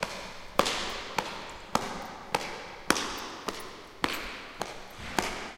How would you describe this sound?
This is a recording of the sound of the steps of one person walking.